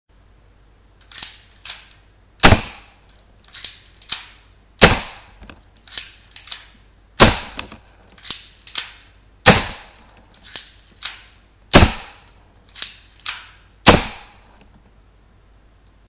Cock and Fire
A gun sound that I made by Putting my microphone in a cereal box, putting paper into the box, and firing into the paper.
Box, Cock, Pistol